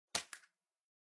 pickup generic
Generic pickup sound
pickup, effect, generic